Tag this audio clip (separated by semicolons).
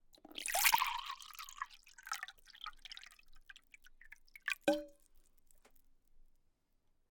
filling; trickle; stream; splash; aqua